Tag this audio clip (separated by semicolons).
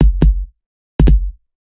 drum; hiphop; kick